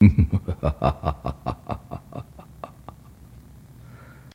Muhuhuhaha Male Adult Laugh vampire psycho evil mean Dracula imp demon
Classic 'muhuhuhaaa' evil male laugh.